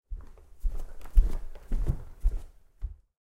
footsteps on wooden floorboards
foot, steps, floorboards